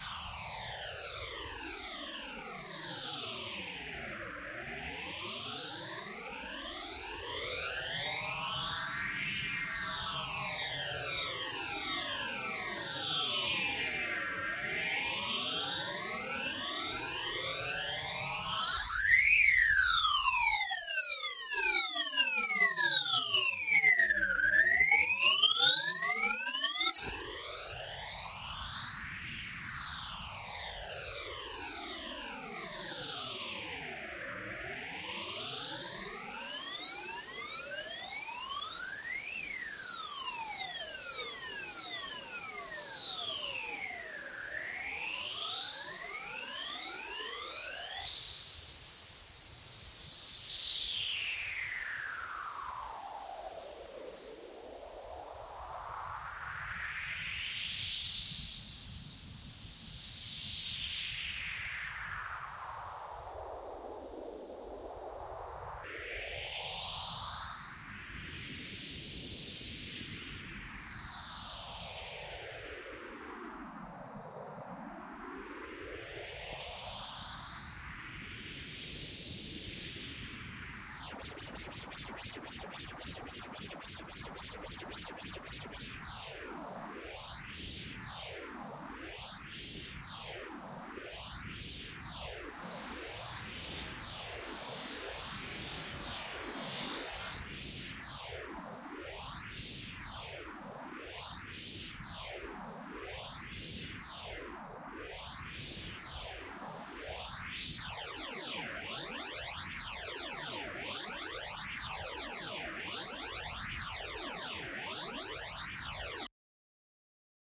Jimmy's White Noise Sweeps
These sci-fi synth sweeps were created by manipulating white noise with filter sweeps and other effects and then using noise reduction to remove the underlying white noise. You would need to pick through them and cut them up, but they can be useful for sound designing light graphic elements that move around and animate on and off.
frequency-sweeps, sci-fi, swooshes, whooshes